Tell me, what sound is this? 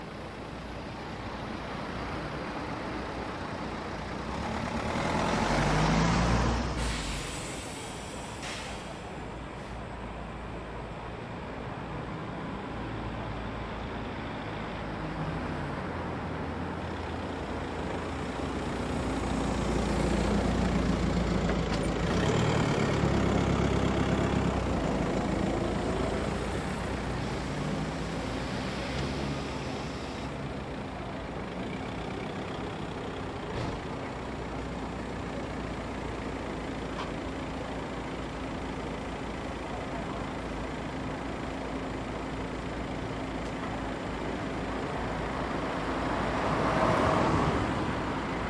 A trip to the lovely english town of Winchester, on a lovely autumn morning...